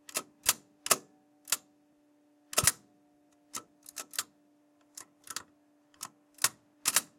The coin-tray from an old coin-operated washing machine being pressed in. Series of instances.
slot-loaded, dryer, washing-machine, tray